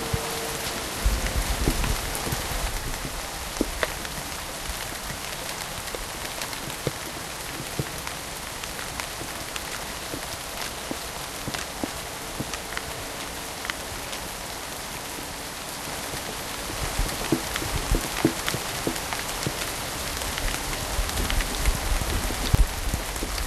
rain hard
Rain falling ion an English garden with db levels increased
hard, weather, rainy, garden, rain, rainfall, spring, English